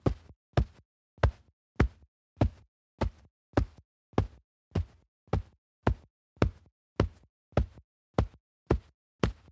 Beating Pillow Backed by Wooden Panel with Closed Fist
Recording of me beating a pillow backed by a wooden panel with a closed fist, as though someone is beating their chest.
Mid frequency fabric rustle, low-mid frequency and bass thuds.
Recorded with an Aston Origin condenser microphone.
Corrective Eq performed.
thudding, beating, panelling, panel